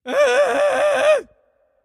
cell scream2
short weird scream for processing